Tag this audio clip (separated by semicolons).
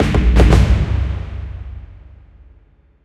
achievement; celebrate; complete; epic; fantasy; fear; frightening; frightful; game; gamedev; gamedeveloping; games; gaming; horror; indiedev; indiegamedev; jingle; rpg; scary; sfx; terrifying; video-game; videogames; win